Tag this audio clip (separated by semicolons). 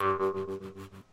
boing; boingy; harp